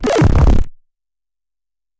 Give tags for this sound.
Gameaudio; SFX; effects; FX; indiegame; Sounds; sound-desing

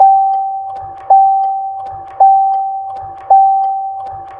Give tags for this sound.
bell; blink; blinking; indication; indicator; loop; notification; strange; warning

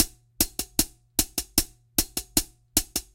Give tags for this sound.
DuB; HiM; Jungle; onedrop; rasta; reggae; roots

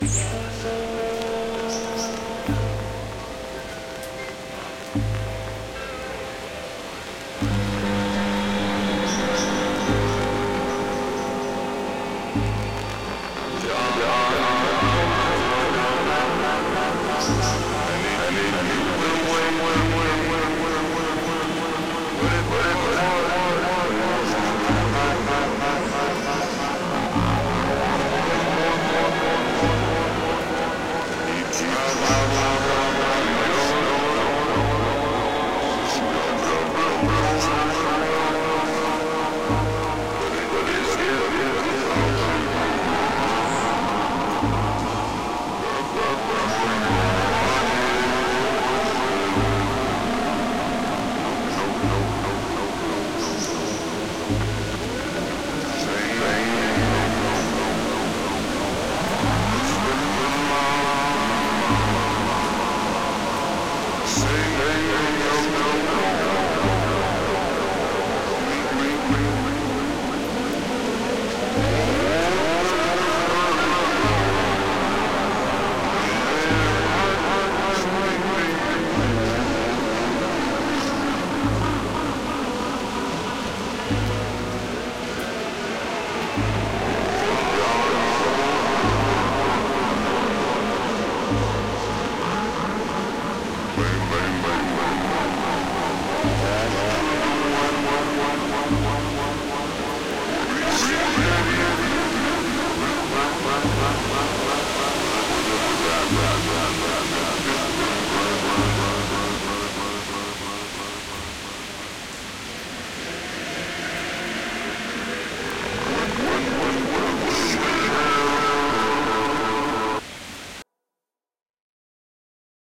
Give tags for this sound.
background; drug; druggie; drugs; music; sequence; weird; your

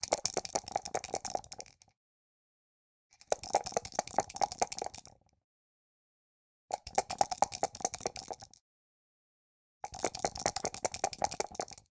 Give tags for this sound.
dice; die; game; yatzy

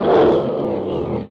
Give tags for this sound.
monster; attack; scream; roar

